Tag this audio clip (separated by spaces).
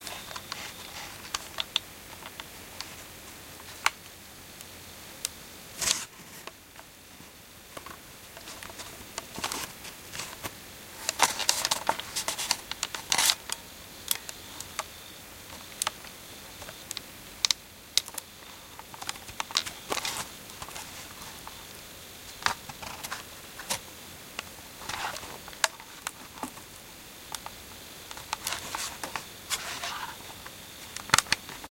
camera clunky hand held noise